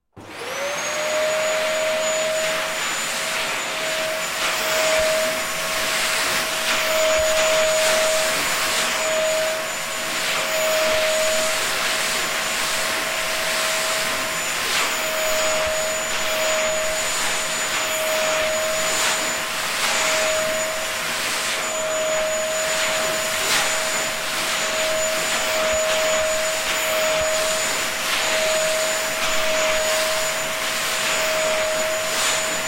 A Dyson vacuum cleaner being switch on and used at close proximity